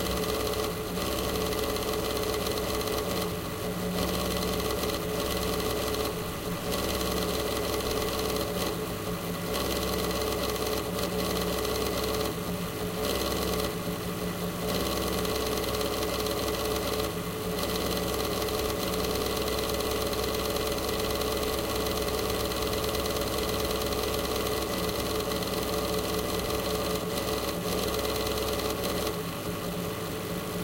My broken pc-cooler (not longer in use)